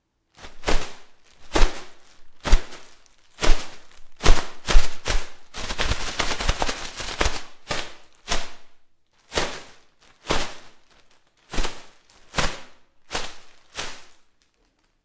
Shaking a large plastic bag around in different ways. Recorded with AT4021s into a Modified Marantz PMD661.
plastic, noise, foley, static